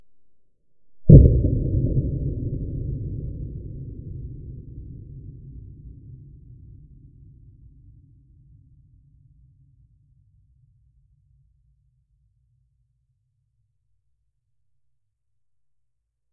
Clapped my hands then slowed down the audio.